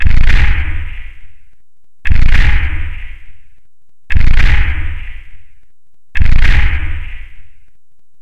mobile suit1
game, large, machine, machinery, robotic, science-fiction, sci-fi